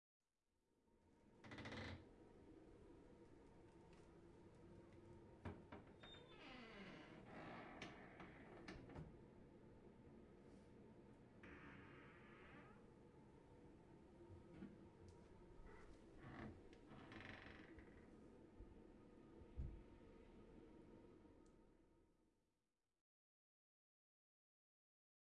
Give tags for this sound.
creaky door

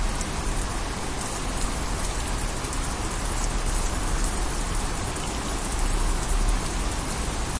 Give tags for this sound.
shower raining weather

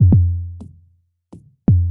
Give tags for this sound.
electro drumloop

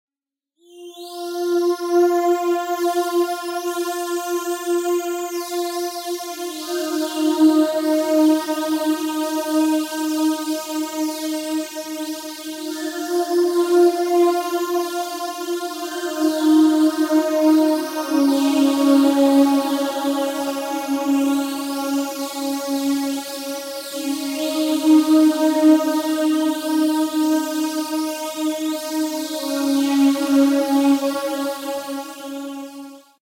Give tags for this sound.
field-recording sound synth synthscape

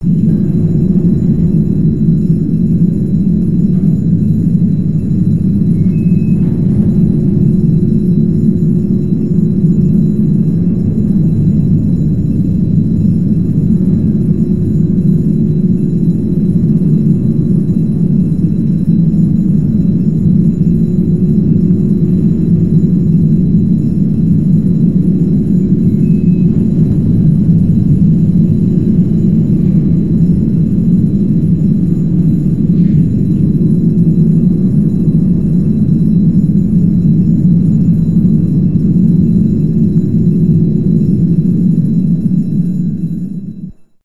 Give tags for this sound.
rushing-water metal impact shipwreck catastrophe alarm scream crush sinking ship

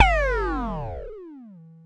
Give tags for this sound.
analog singleshot drumbrain synth adx-1 mam

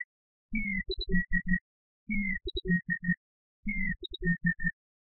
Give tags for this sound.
element loop synth

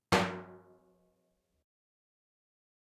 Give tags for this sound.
pondos tradition iekdelta davul kick

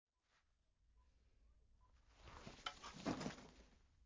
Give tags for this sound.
foley setting-down duffel-bag clothing-sounds drop reverb

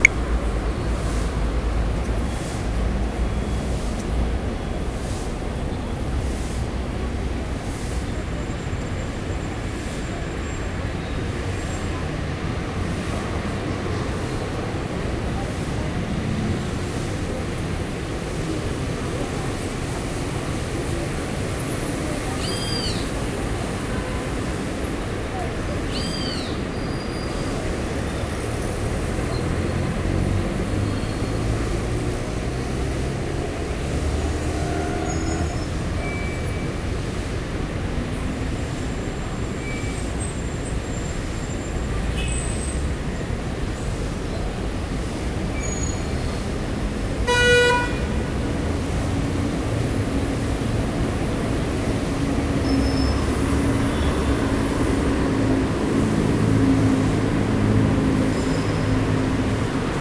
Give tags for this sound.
Belo-Horizonte carros cars city paisagens-sonoras peoples pessoas praca-da-assembleia rua sound-of-city sound-scapes square street tjmg

